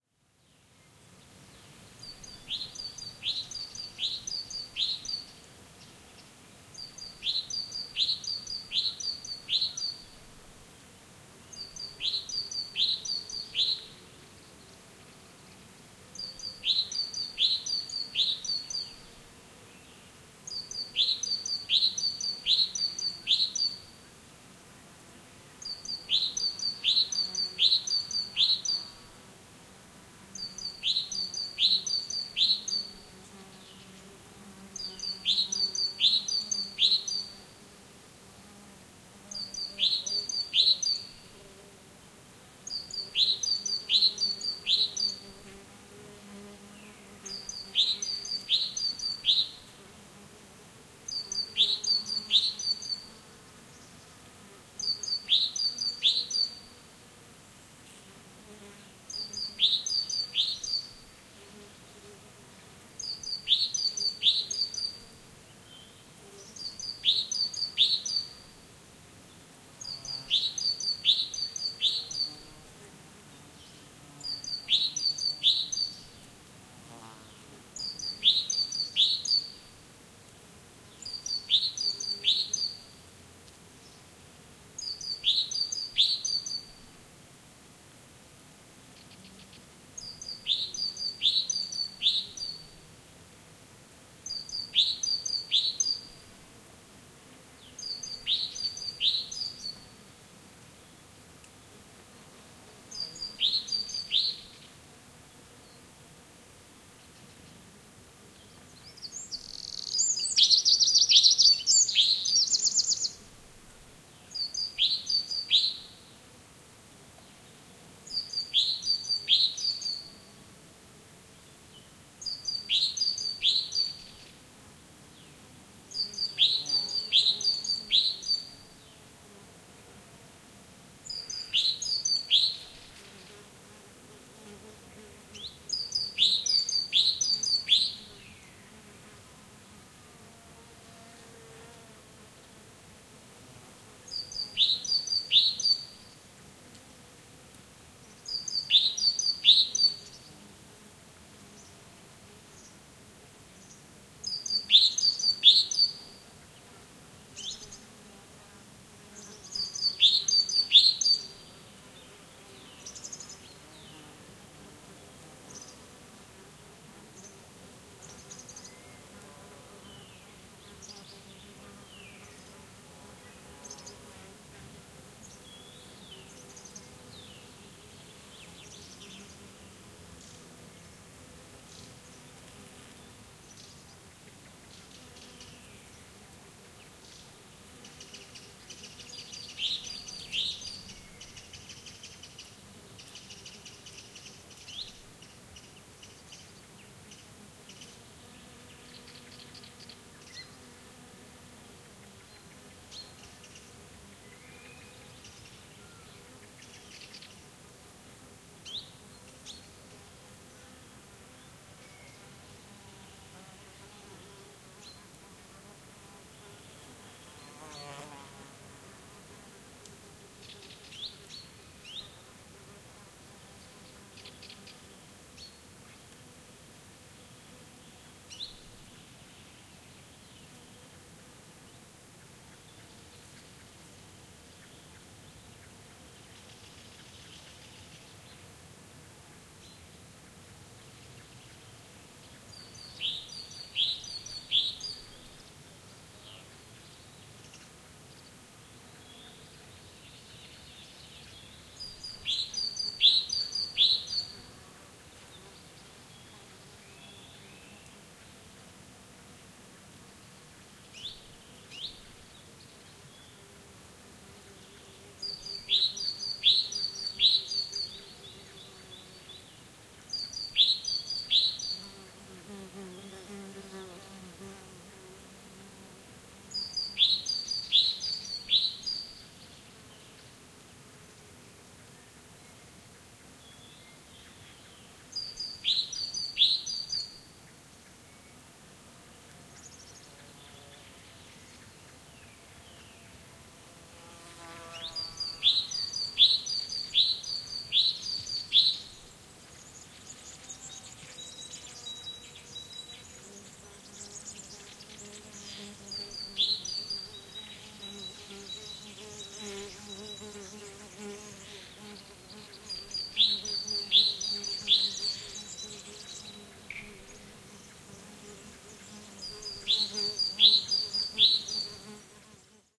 Take of ambiance in Mediterranean scrub, with insects buzzing, different birds singing, and noise of wind on vegetation. Recorded near Arroyo de Rivetehilos (Donana National Park, S Spain) using Audiotechnica BP4025 > Shure FP24 preamp > Tascam DR-60D MkII recorder
forest, insects, nature, donana, field-recording, south-spain, spring, ambiance, birds